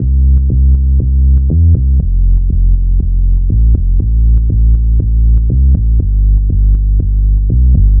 a minor bassline melody
urban bass preset from ableton bass rack plays a minor melody.
it is compatible with sound- a minor keys,string and pad which i also uploaded here